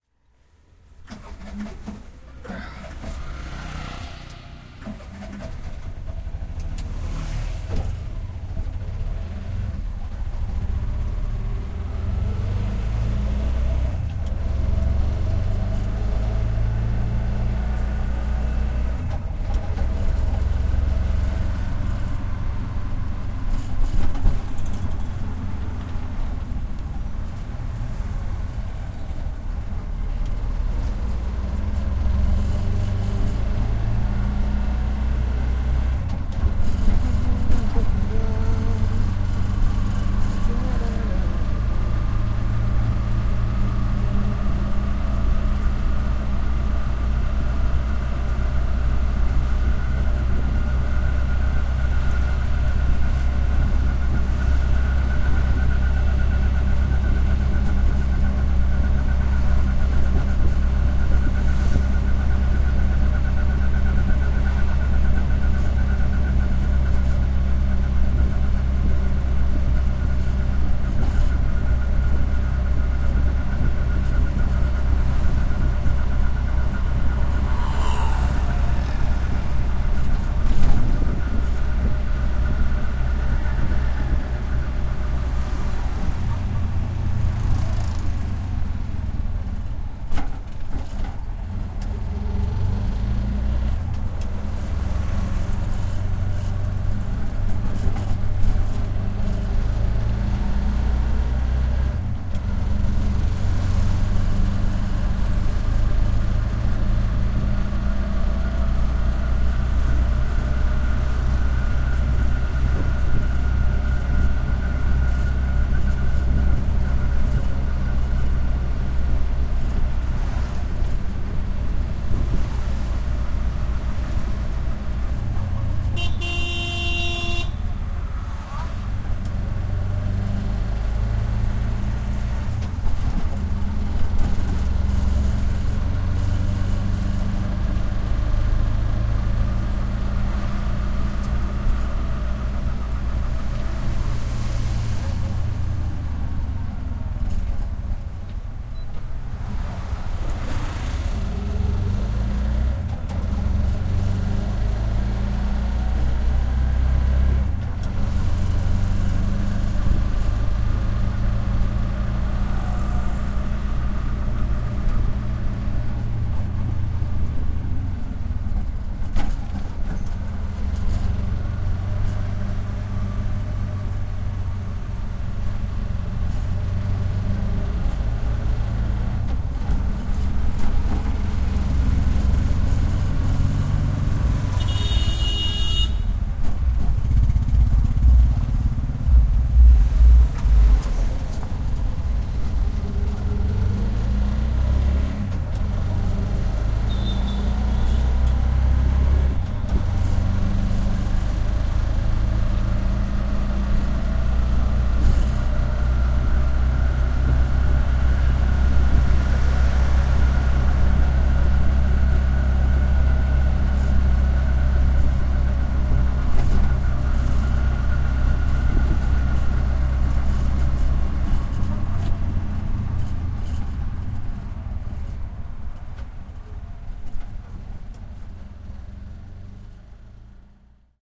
INSIDE AN AUTO RICKSHAW IN MUMBAI
this was recorded at Mumbai on the first day I bought my TASCAM DR 05. Its from the inside of a Mumbai auto rickshaw.
AUTORICKSHAW, MUMBAI, MUMBAITRAFFIC, TRAFFIC